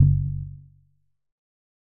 Percussive Hit 02 01
This sound is part of a series and was originally a recorded finger snap.